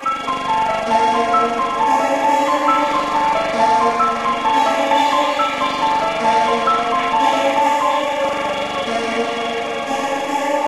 dead end street
self made pad mixed with a voice sample that i made myself